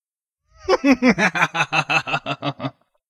Laughing Kazu Remastered & no hiss
[2022-11-13].
If you enjoyed the sound, please STAR, COMMENT, SPREAD THE WORD!🗣 It really helps!
More content Otw!
if one of my sounds helped your project, a comment means a lot 💙
Halloween, Game, Laughing, Movie, Anime, Film